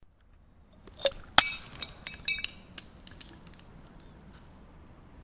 Glass Smash 3
One of the glass hits that I recorded on top of a hill in 2013.
I also uploaded this to the Steam Workshop:
fracture, shatter, hit, breaking, crack, shards, smash, broken, crunch, glass, break, smashing, bottle